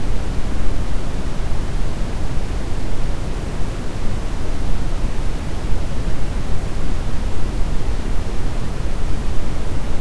Brown noise generated with Cool Edit 96. No effects.